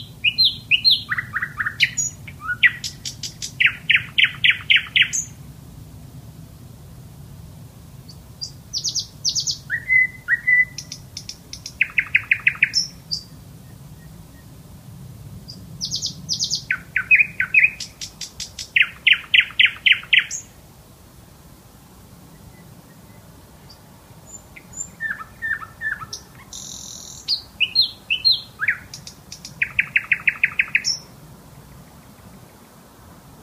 Nightingale Bird Sings his song
Solovey (Nightingale) Bird sings his song in the Village near Moscow (Russia) and was recorded with IPhone 5 videocamera ;)
bird, solovei, birdsing, solovey, nature, birdsong, night, nightingale, alive, birdy, field-recording, voice